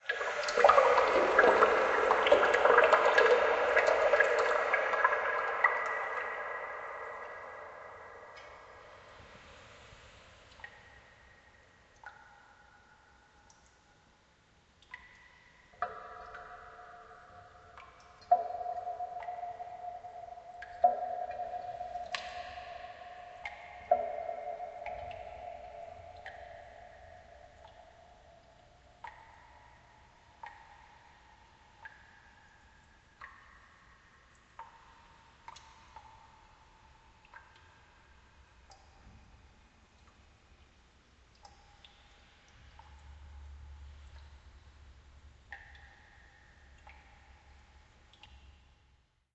Cave Echo 2 (Water SFX remixed)
The reverb on this one is longer. Enjoy!
reverb, echo